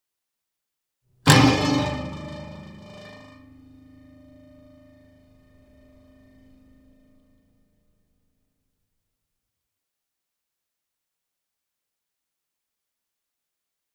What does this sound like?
1 Audio Track
strings, fork, vibration
strings, vibration, fork